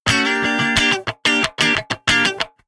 Recorded using a Gibson Les Paul with P90 pickups into Ableton with minor processing.